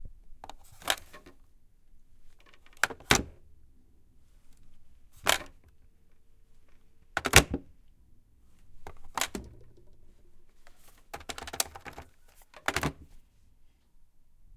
Picking the phone up then putting it back down again